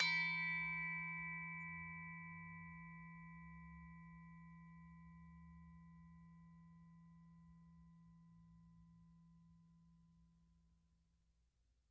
Bwana Kumala Ugal 04
University of North Texas Gamelan Bwana Kumala Ugal recording 4. Recorded in 2006.